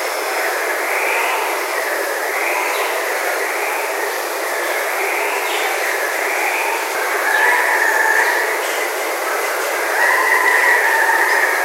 andean cock of the rock
Distant calls from several Andean Cocks-of-the-rock, with a waterfall. Recorded with an Edirol R-09HR.